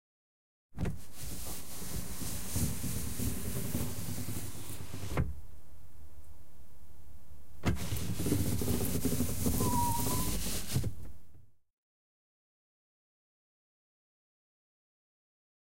down and up window car